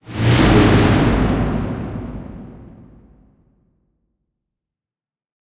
Whoosh whitenoise modulation
electronic, noise, sci-fi, sfx, synth, whoosh